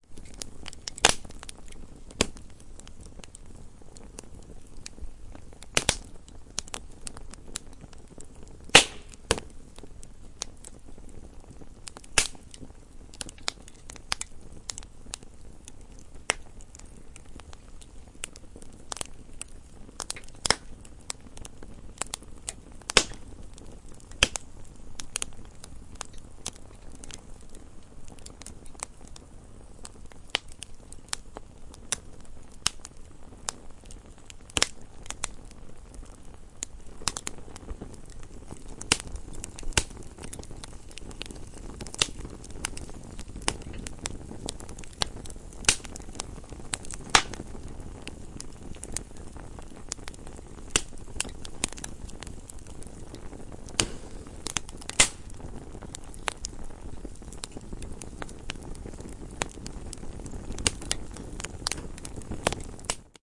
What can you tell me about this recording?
record of a little fire in a silent garden, with a lot of crackling and little "explosions"
Recorded with Zoom H5 - XY